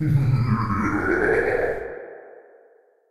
The evil laugh of Satan.
evil, hell, laugh, satan